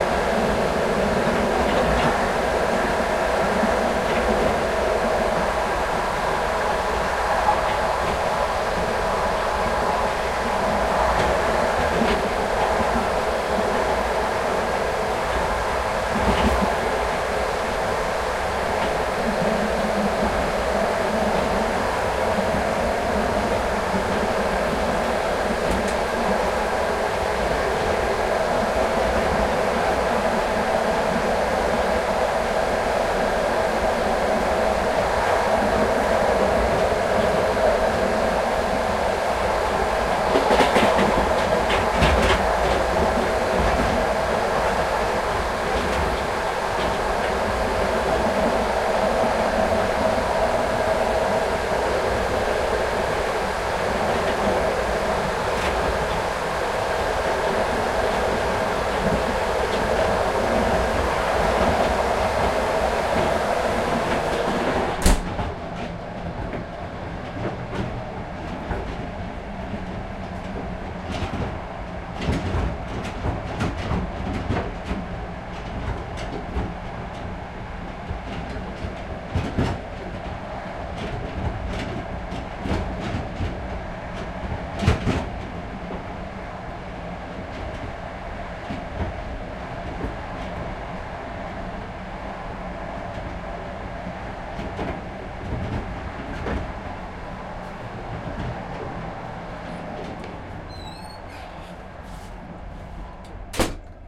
tambour passenger wagon 20130329 4
Sound in the tambour of passenger wagon.
Recorded: 29-03-2013.